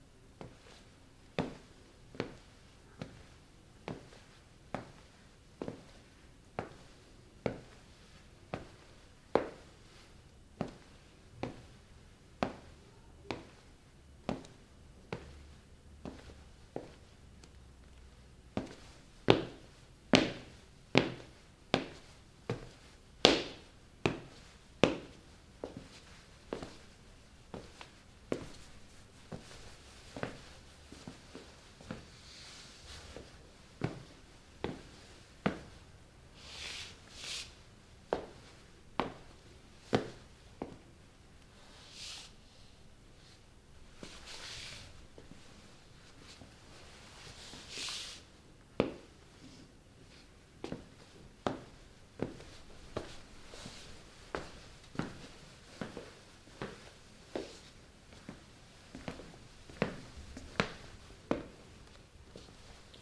foley footsteps walking in room indoors
walking, indoors, footsteps, room